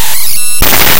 Harsh digital noise samples made with Max/MSP openany~ object, which loads any files into audio buffer.